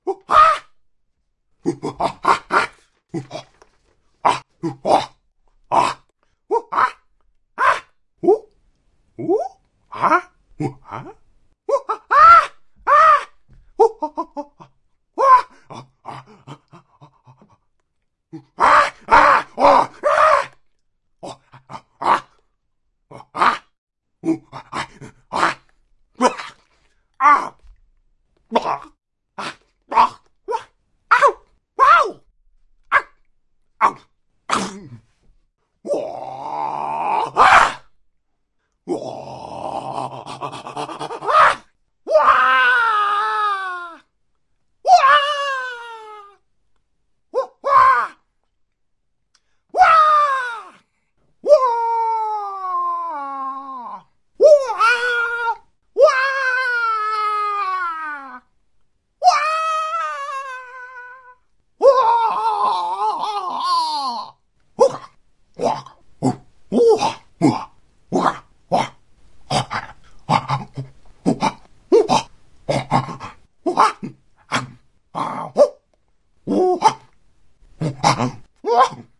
Different emotions of a cartoon/anime style monkey in a war game.